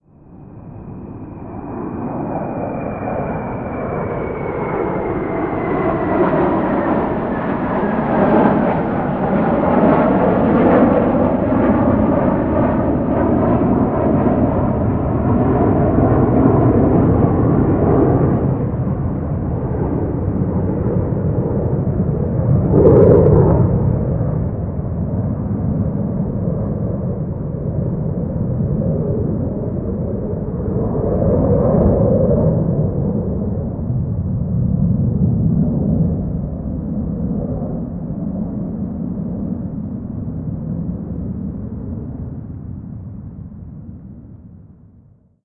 jets low pass
Four jet low pass recorded with a Zoom H4n. Processed to remove some background sounds.
afterburners, air, engines, fighters, flyby, flyover, jets, low, pass, planes, thrusters